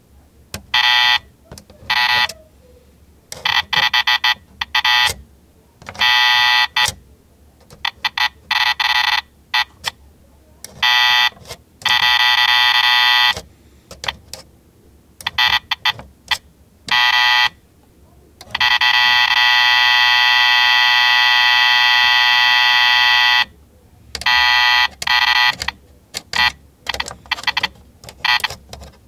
Operation Buzzer
Activating the buzzer of the Operation board game